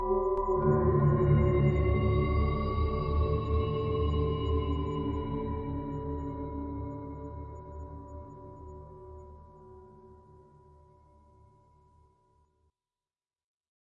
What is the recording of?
Find Newgt
EFX sound created by Grokmusic on his Studios with Yamaha MX49
sound, sci-fi, efx, effect, Distorsion